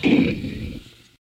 nice non-musical crash, probably something falling in a restaurant, but sounds like foley!
crash, tumble